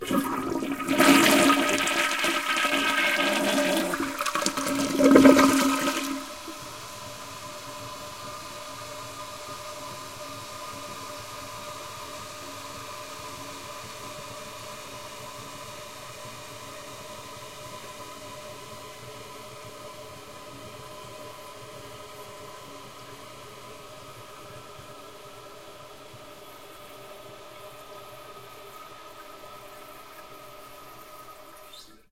This is a standard toilet flush recorded at the hampton inn in West Jefferson, North Carolina, United States, in May 2010.